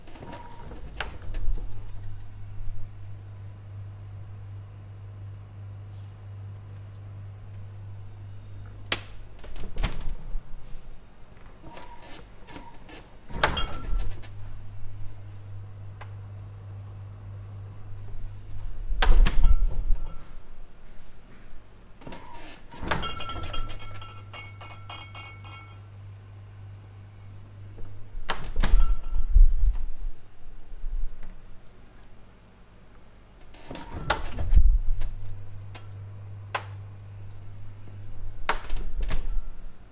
Fridge Open Door
Fridge Door: various speeds of opening a Fridge door and closing
refridgerator, open, fridge, box, doors, opening